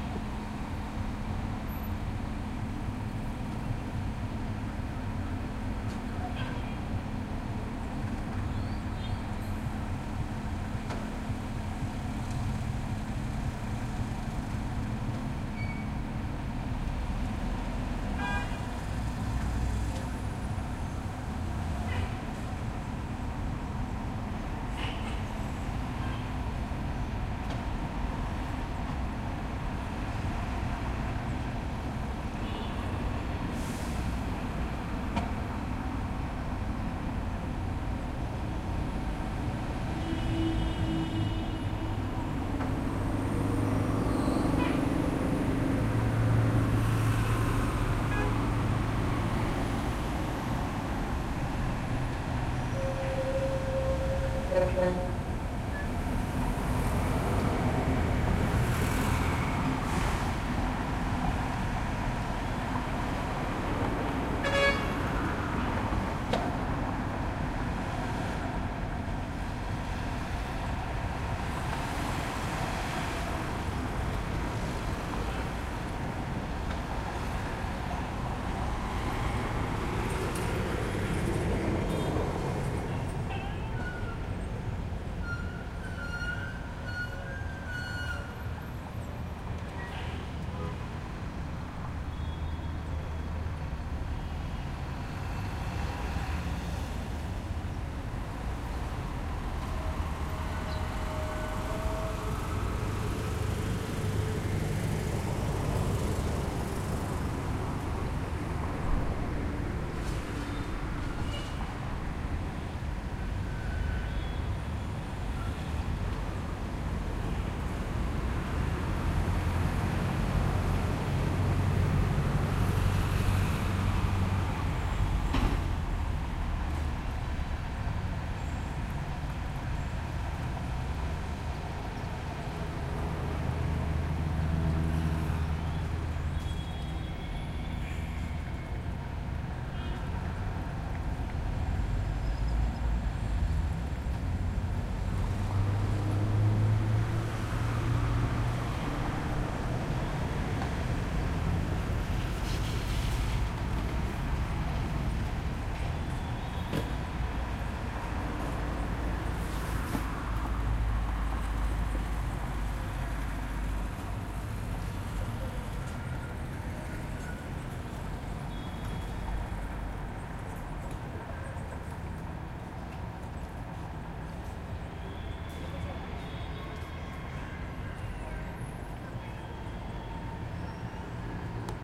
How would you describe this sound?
Calle Killa
Sunday quiet morning in the city of Barranquilla, Colombia. Mañana de domingo tranquila en Barranquilla, Colombia
Street, field-recording, Barranquilla, City-hum, Calle